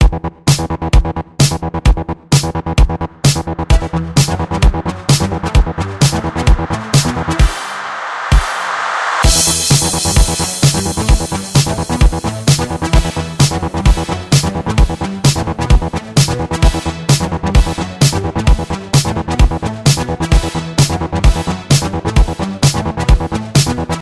Loop Run For Your Life 00
A music loop to be used in fast paced games with tons of action for creating an adrenaline rush and somewhat adaptive musical experience.
battle, game, gamedev, gamedeveloping, games, gaming, indiedev, indiegamedev, loop, music, music-loop, victory, videogame, Video-Game, videogames, war